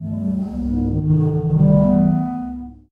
Giant breathing 3
One in the series of short clips for Sonokids omni pad project. It is a recording of Sea organ in Zadar, spliced into 27 short sounds. A real giant (the Adriatic sea) breathing and singing.
breathing, field-recording, giant, sea-organ, sonokids-omni